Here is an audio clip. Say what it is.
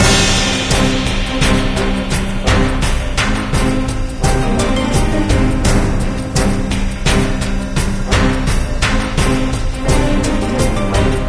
battle-march action loop
action, battle, blood, bullet, crazy, gun, loop, march, shot, war